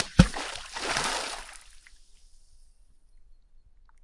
A rock thrown to a lake.